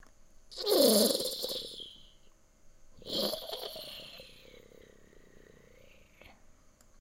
quiet zombie moans
Part of the sounds being used in The Lingering video game coming soon to PC. Created using Audacity and raw voice recording.
Zombie, PostApocalypse, VideoGame, Horror, Growl, Monster, Survival, Roar, Scream, Moaning, Apocalypse, Creature, Scary